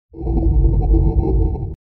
Stone Push
drag, lid, rock, boulder, stone, push, earth